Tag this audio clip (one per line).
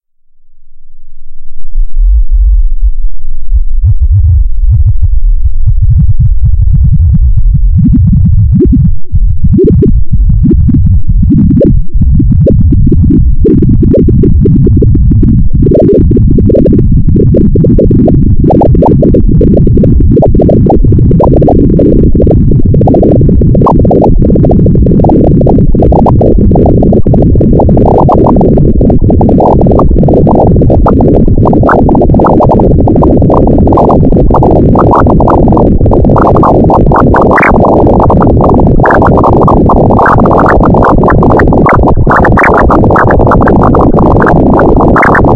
chaos
chuck
programming
sci-fi
sine